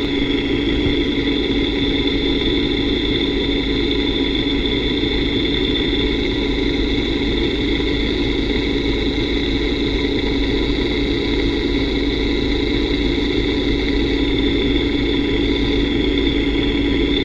Using an automotive stethoscope on a power steering pump.
stethoscope, Power, steering